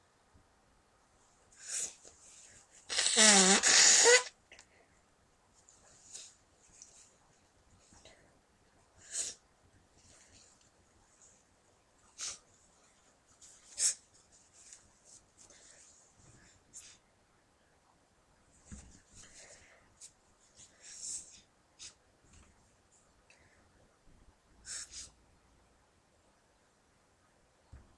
Crying with mucus
Crying and blowing one's nose.
blow-nose, crying, mucus, nose